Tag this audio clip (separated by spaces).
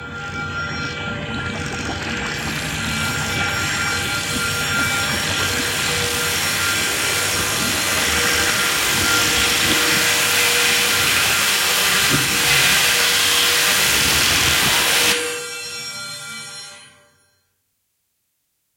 film dark sound-design sci-fi riser chaos cinematic